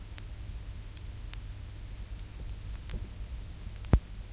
Record Player - Needle lifting from Track
Recording of a record player on a vinyl long playing record
LP, Needle, Player, Record, Recording